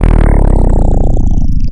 sawtooth-power-stop-e1-g0
Sound effect or weird sub bass loop.
This is a very low frequency sawtooth chirp starting at 41.2 hz and finishing at 24.5! Creating a slide from note E to G. I then applied another sliding pitch shift down a full octave making a quick power down or stop sound. 1 bar in length, loops at 140bpm
power-down, wave, 140bpm, pitch-shift, loop, sub, sub-bass, 140-bpm